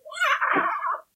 Made using a ridged piece of plastic.